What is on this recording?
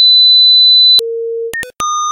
glitch bleeps
some nice sounds created with raw data importing in audacity
experimental, noise, weird, audacity, glitch, electronic, freaky, raw-data, strange